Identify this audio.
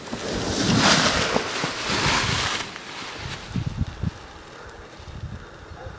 Lofi DSLR audio of a paraglider taking off from about 15 feet away.
Off, Parachute, Take, Wind